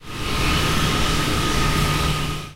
Crane, Noises 02
An active crane.
This sound can for example be used in real-time strategy games, for example when the player is clicking on a building/construction - you name it!
/MATRIXXX
area, noise, noises, workers, object, builders, work, crane, fields, work-field, machine